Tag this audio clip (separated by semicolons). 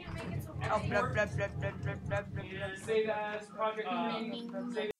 bored; free; fun; random; saturday; silly; sound; techno